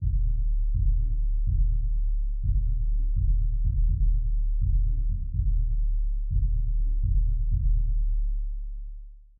Intended to sound like distant Hip Hop music being played in the background.
background, bass, beat, distant, hip, loop, music, rap, thump, trap